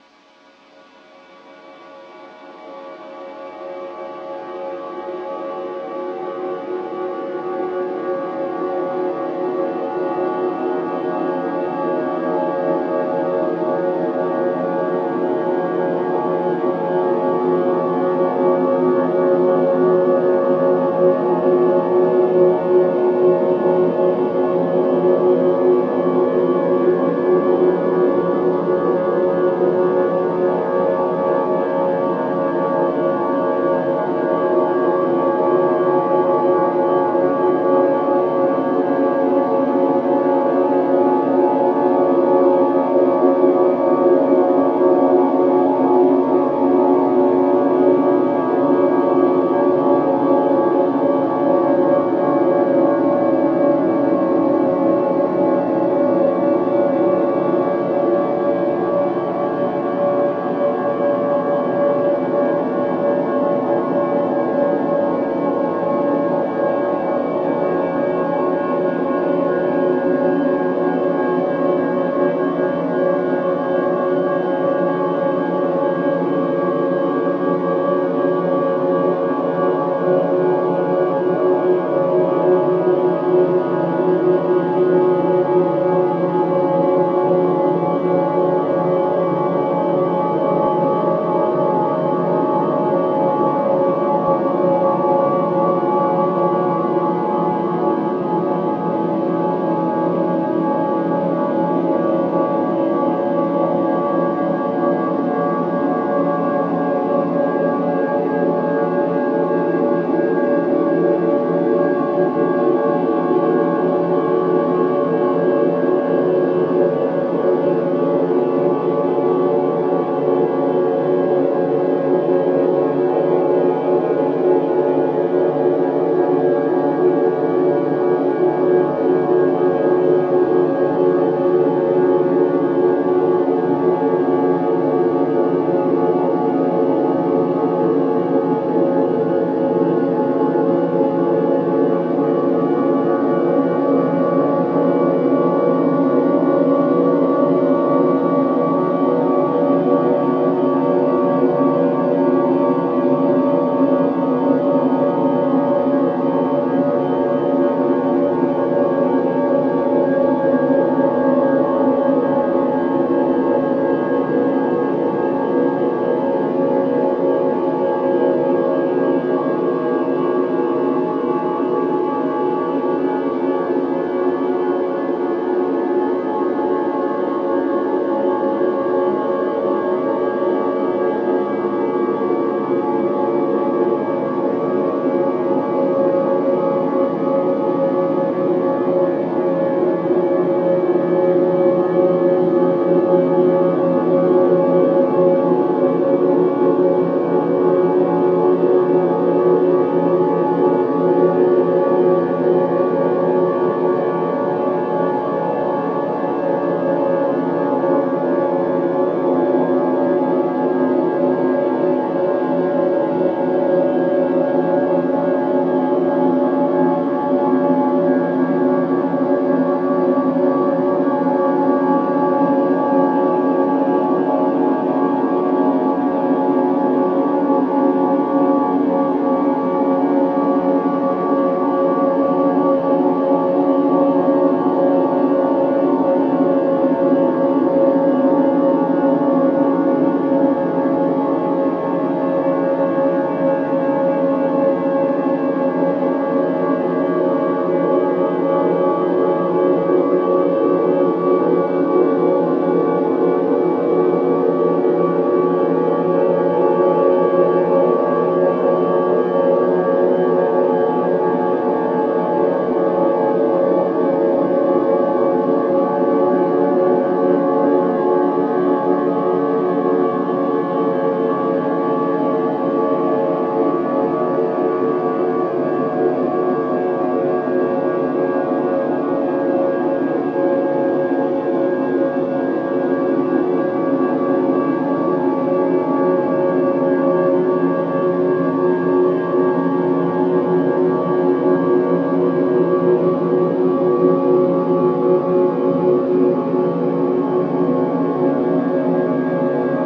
white noise +paulstretch+delay
audacity, noise, paulstretch